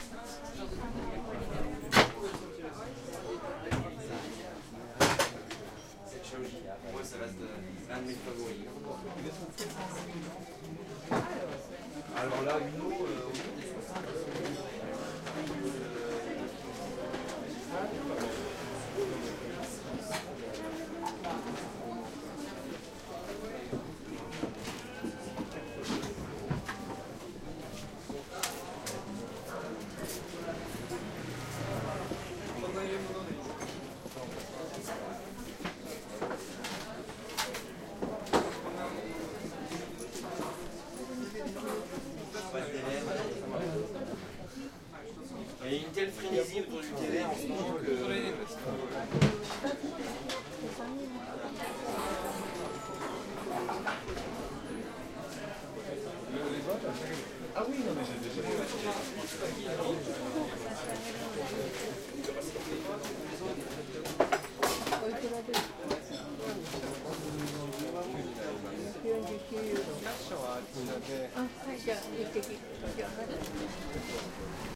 recorded inside of a teashop in paris.
tea, people, talking, interior, french, store, shop, paris